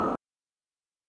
Tuba Percussion - Hi Hat Closed
Closed hi hat sound made by breathing through a tuba. Made as part of the Disquiet Junto 0345, Sample Time.